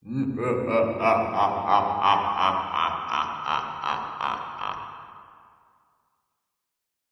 An evil laugh, perfect for terror videos.
Recorded with the microphone of my pc and edited with audacity.
terrifying, thrill, dramatic, suspense, terror, sinister, creepy, haunted, macabre